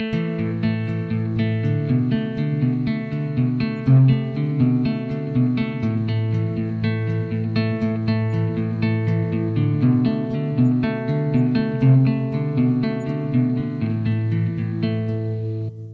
A simple phrase playing Aminor with a B and a C from the Aminor scale.
Played on a Washburn Festival series guitar into Ableton.
Place: Njardvik - Iceland.